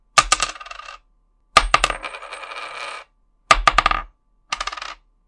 Coin Drops
Dropping some coins!
coins, drops, impacts